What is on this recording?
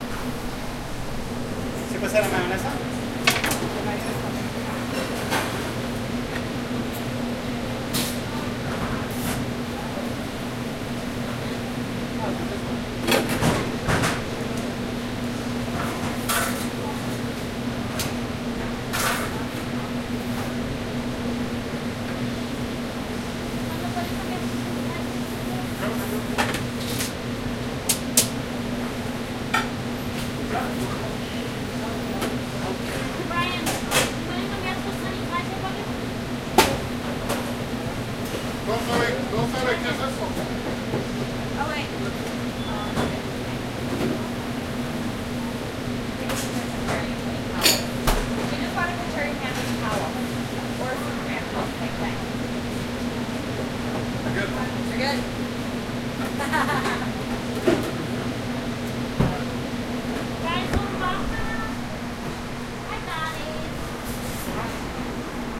MHFW Foley Kitchen
Working in the kitchen of a restaurant
noise-bed, background, kitchen, sound-effect